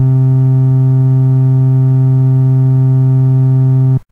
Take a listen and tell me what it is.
I recorded this Ace tone Organ Basspedal with a mono mic very close to the speaker in 16bit